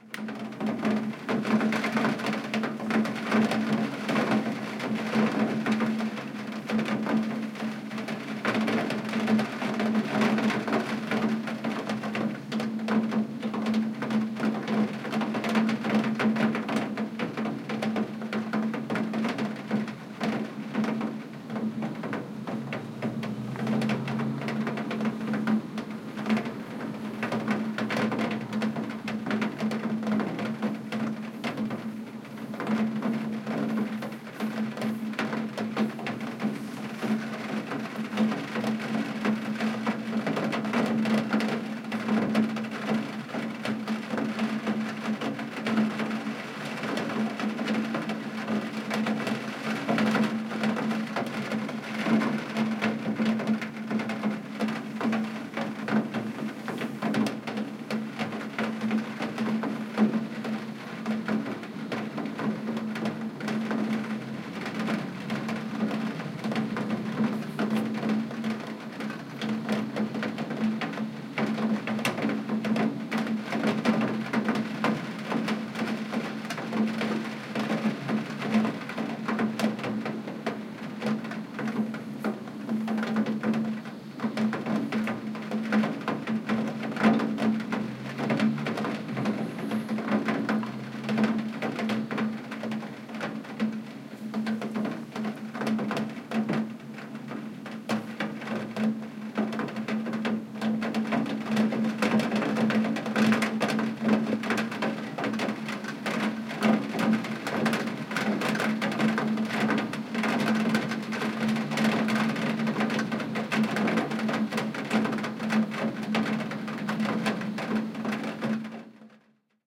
Raindrops on window sill 5 (close)
raindrops, weather, dripping, nature, ambience, windowsill, raining, drip, drops, droplets, wet, water, Rain